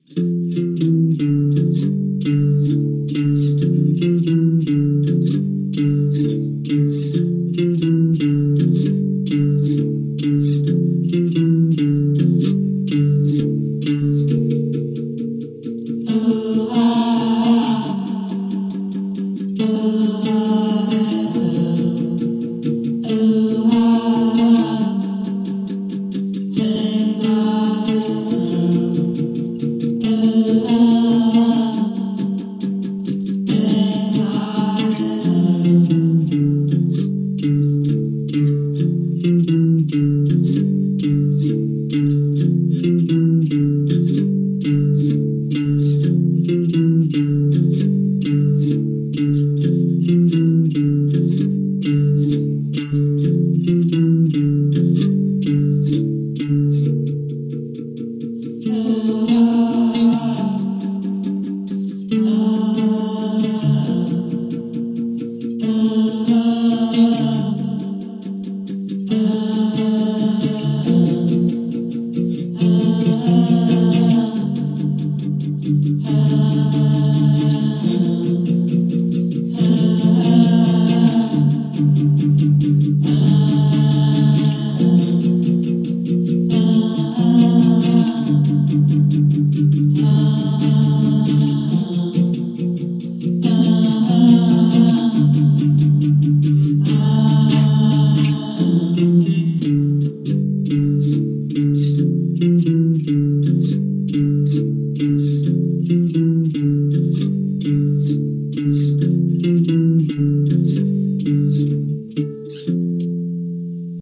Vcoals used MXL 6036 => ART Studio v3 => computer
Guitar used Sennheiser => FP31 mixer => Tascam DR05.
Edited with Reaper (DAW)
I'm the vocalist and guitar player.
vocals, song, guitar